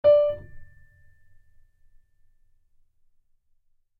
realistic piano tone